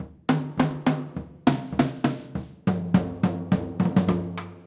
trapam ta poum
acoustic, drum